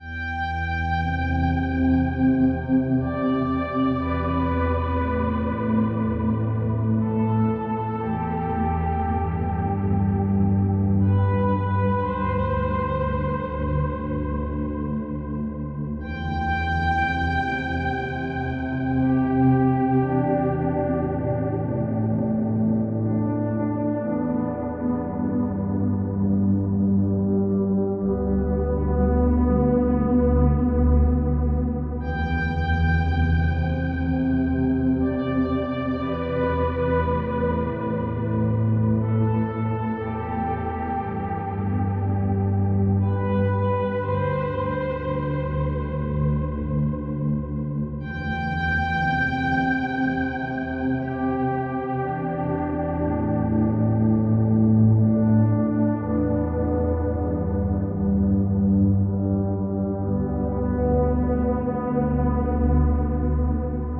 Distant zebra C
ableton, loop, zebra